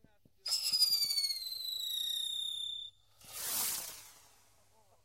Fireworks recorded using a combination of Tascam DR-05 onboard mics and Tascam DR-60 using a stereo pair of lavalier mics and a Sennheiser MD421. I removed some voices with Izotope RX 5, then added some low punch and high crispness with EQ.
bang, pop, whiz, fireworks